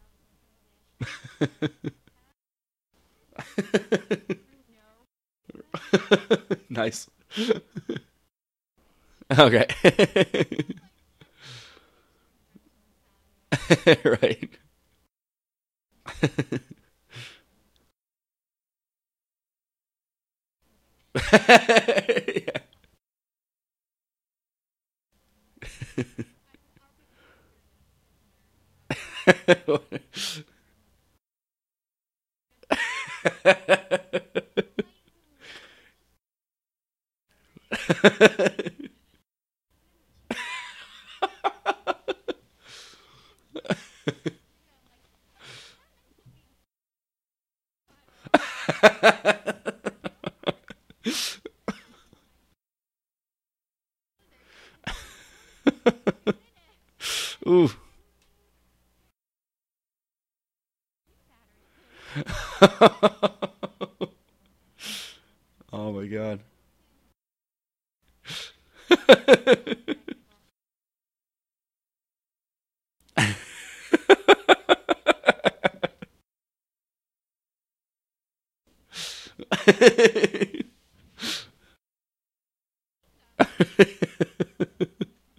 Assorted sincere laughing from a man.
chuckle, chuckling, foley, giggle, giggling, laugh, laughs, laughter, male, man